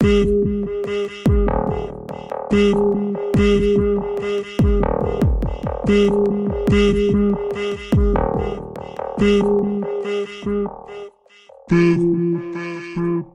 Back-Tracking
Me forgetting something and backtracking my steps
Voice, Drum, World